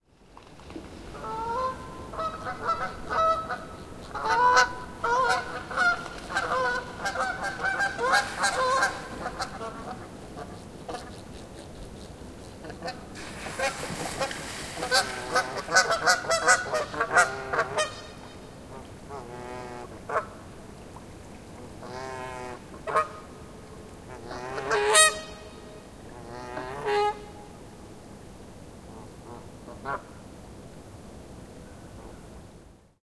Geese at a small german lake
Geese quacking at a small german lake. Recorded from a distance.